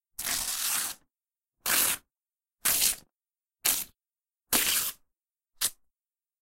Breaking paper
book, break, paper, tear